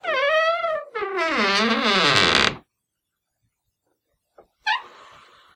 This is the sound of a common household door squeaking as it is being opened or closed.
This file has been normalized and most of the background noise removed. No other processing has been done.